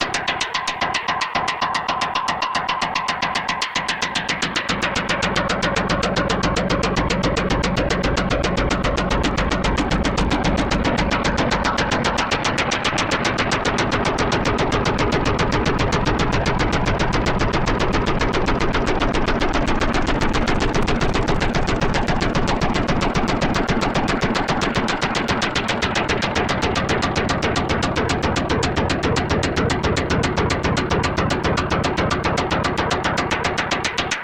A sound sequence captured from different points of my physical model and different axes. Some post-processing (dynamic compression) may present.
weird, finite-element-method, synthesis